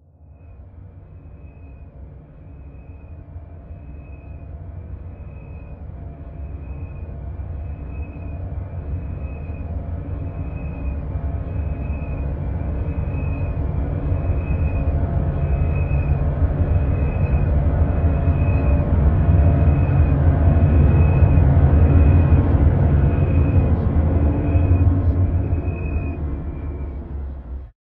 FEAR BUILD UP
BUILDUP, HORROR, FEAR, ATMOSPHERE, SUSPENSE, TERROR, DISTORTED, JUMP